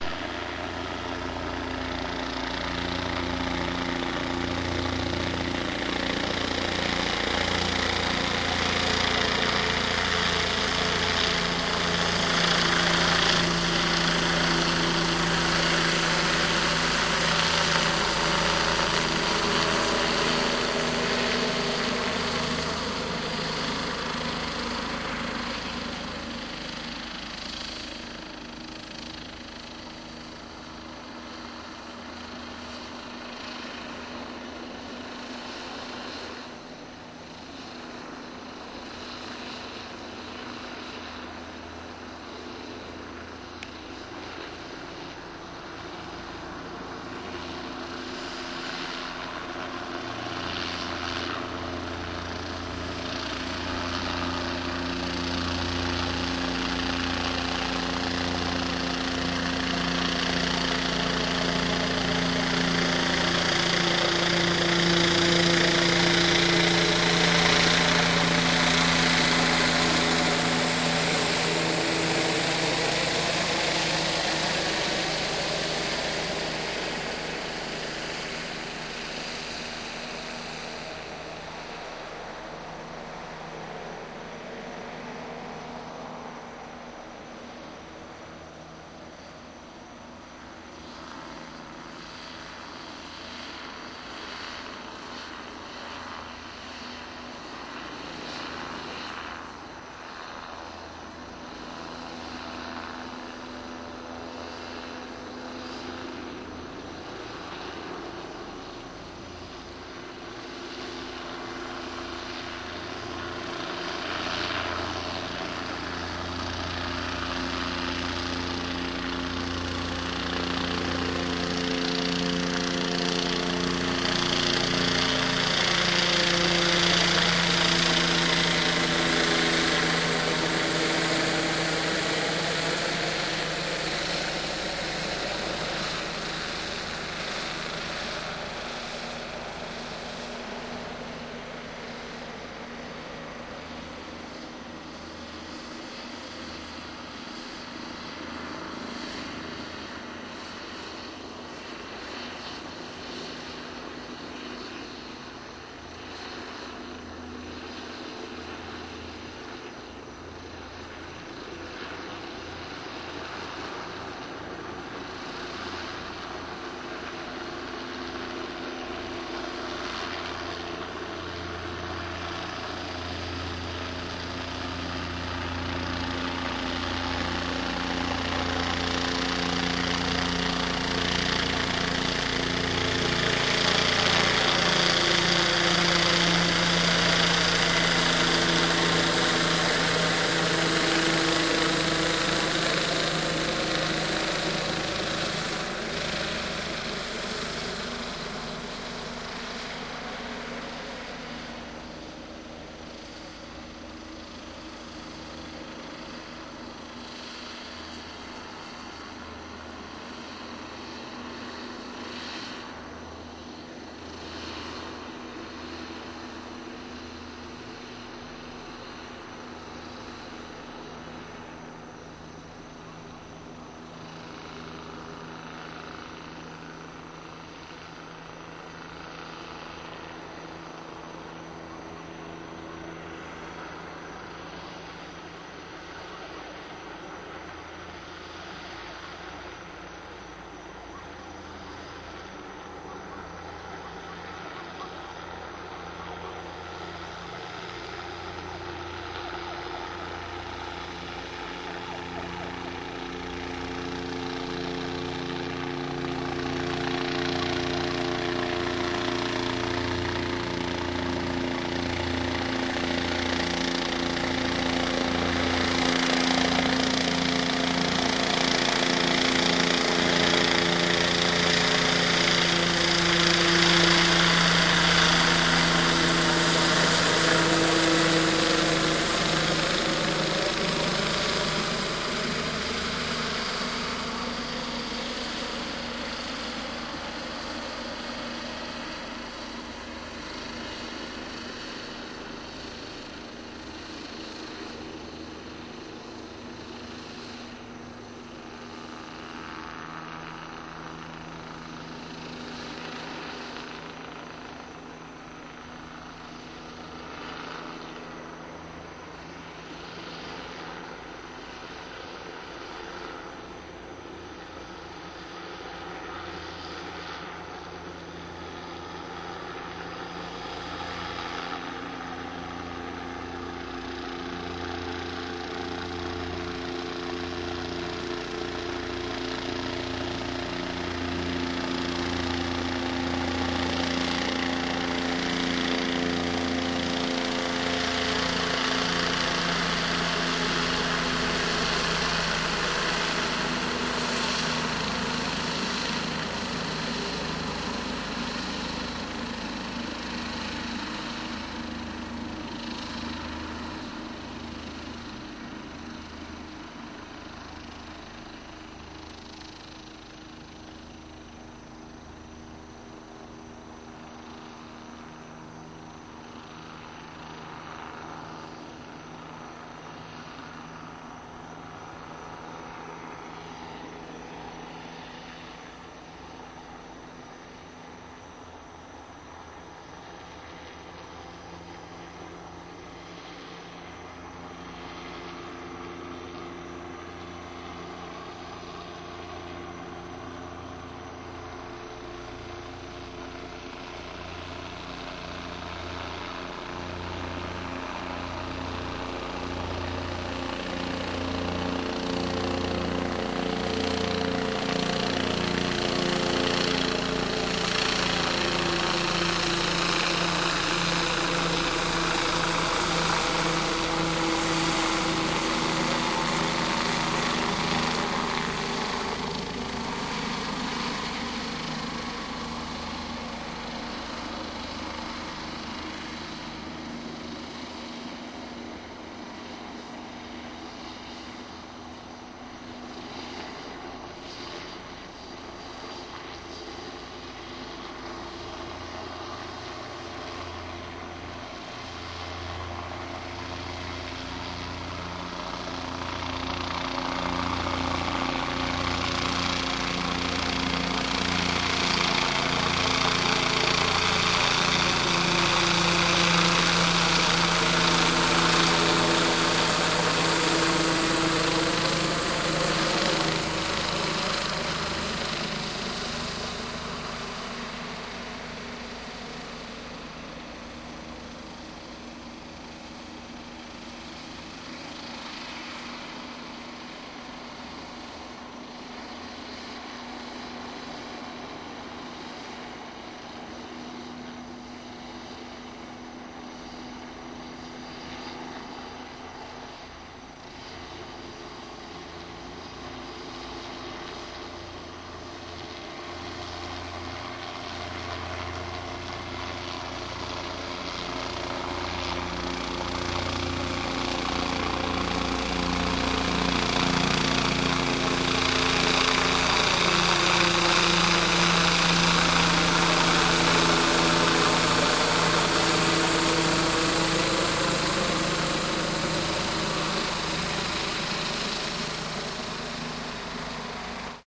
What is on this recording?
Police helicopter and a dozen cop cars, including a K-9 unit searching the hood, recorded with DS-40 and edited in Wavosaur. From a closer perspective after chopper patrol pattern moves south.